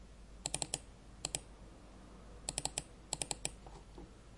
Press The Click Button On Mouse Recording at home